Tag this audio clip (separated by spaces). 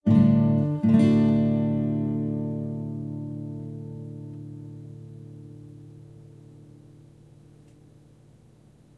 Guitar ambience serene